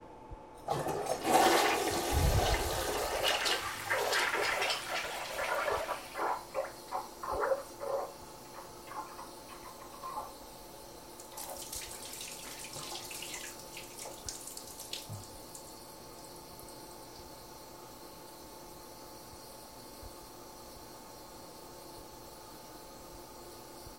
Toilet Clogged
A toilet being clogged and overflowing onto the floor.
flush, wc, water, plumbing, clog, toilet, overflow, closet, drip